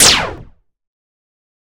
laserblast effect
Basic Sci Fi style plasma sound